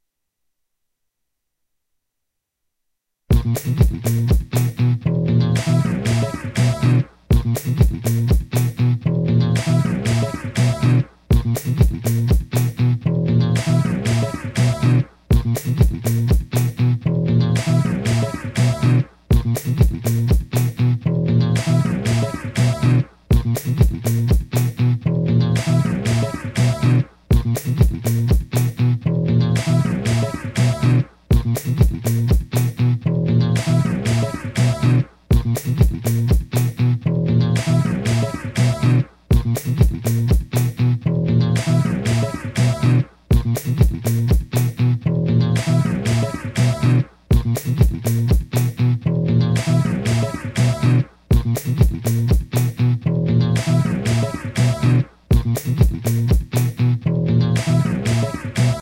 call and response xtra bass
Same as Call and Response only with extra bass, Duh!